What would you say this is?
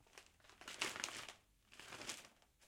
Taking a newspaper
{"fr":"Prendre un journal","desc":"Prendre un journal en papier.","tags":"papier journal manipulation"}